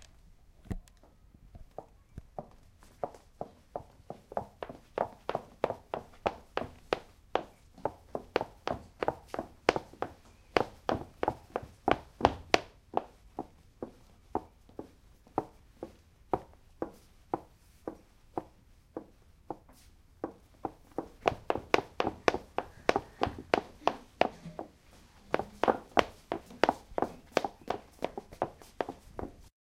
Pas sur le sol
Steps on the floor made with a pair of Swedish clogs. Recorded by a Zoom2 device.
shoes, walking